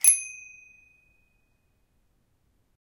Bell Ring
Just my bike's bell. Recorded using a Zoom H2.
driving, light, ring, bright, bicycle, city, move-aside, warning, bell, bike, ringing, belling, signal, urban, metal